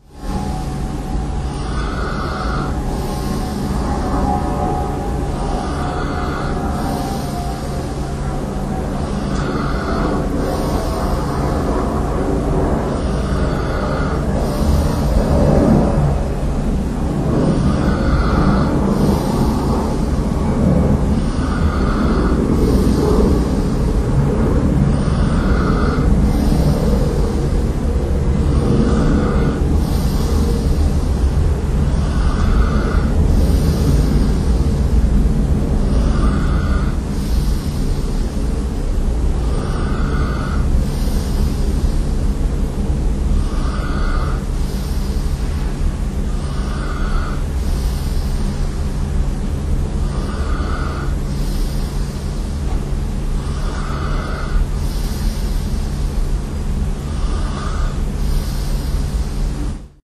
An Airplane that left Amsterdam Airport Schiphol a short while ago, passes me sleeping. I haven't heard it but my Olympus WS-100 registered it because I didn't switch it off when I fell asleep.